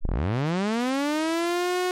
SCIAlrm 8 bit sweep mid
8-bit similar sounds generated on Pro Tools from a sawtooth wave signal modulated with some plug-ins
alert, synth, alarm, beep, 8bit, robot, scifi, computer, spaceship